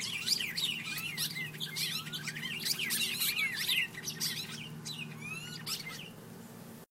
Birds chirping. Recorded with an iPhone using Voice Memos.
nature, animal, tweet, chirp